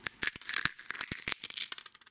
Bone break/crack 2
Made with and egg :D
bone, crack, fight, combat, breaking, fracture, break, bones, cracking